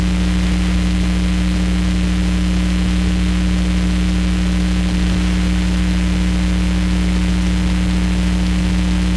computer recording recording
Most likely the first recording of a computer recording recorded with old phone pickup microphone. Think about that....